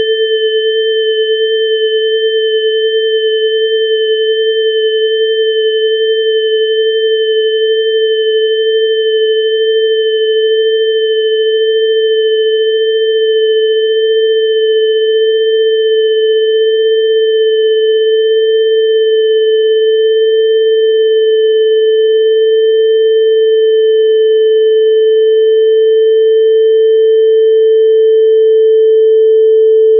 Long stereo sine wave intended as a bell pad created with Cool Edit. File name indicates pitch/octave.
bell, synth, multisample, pad